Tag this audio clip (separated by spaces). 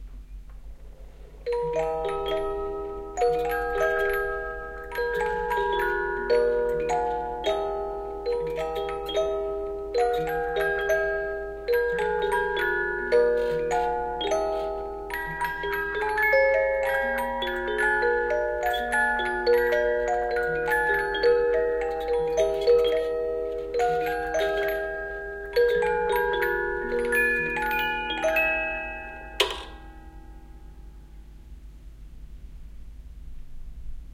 o-tannenbaum music-box symphonion oh-christmas-tree